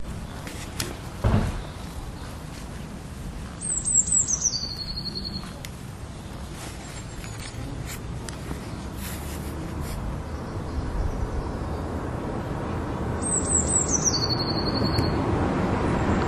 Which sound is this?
A bluetit twittering. I was a bit pressed for time so only recorded 2 twitters.
Recorded with an Olympus WS-100 voice recorder.